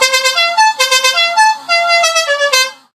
La cucaracha car Horn
The most famous car horn melody "La cucaracha"